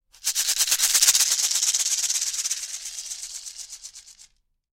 A native north-American shaker such as those used for ceremonial purposes i.e.; the sweat lodge.